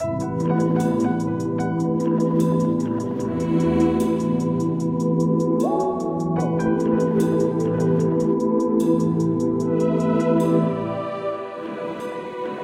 Loop TrappedInADream 02
A music loop to be used in storydriven and reflective games with puzzle and philosophical elements.
indiegamedev, gaming, gamedeveloping, sfx, Puzzle